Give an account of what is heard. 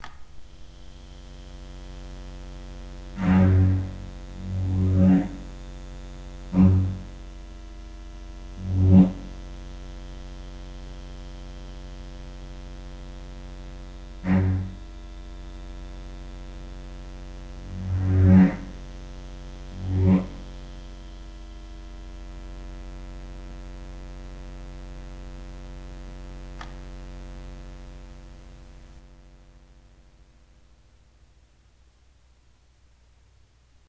An emulation of a light saber from the movie Star Wars. Made by timestretching and reversing several cello samples. The background hum is a low pitched square wave generated by the 3xOsc in FL Studio. At the beginning, the lightsaber is switched on. That sound was made by lightly clicking two drumsticks together. I will upload that sample soon.